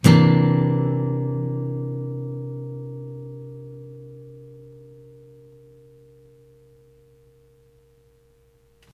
Yamaha acoustic guitar strummed with metal pick into B1.